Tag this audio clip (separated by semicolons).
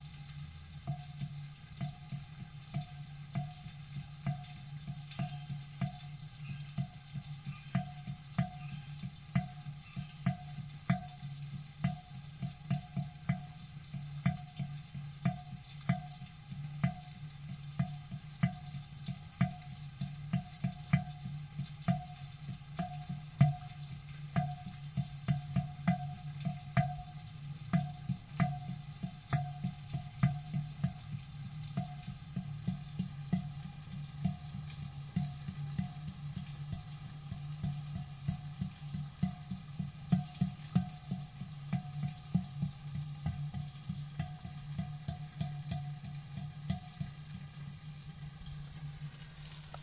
jug; rain; rhythm; hit; object